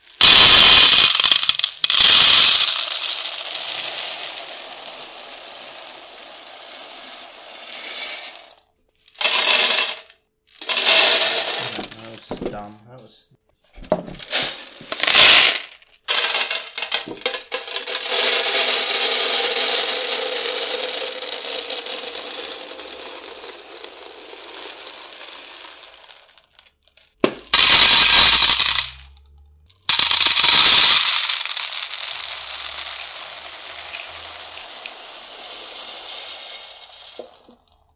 Some foley I made from pouring coffee beans in and out of a coffee tin.
beans, pouring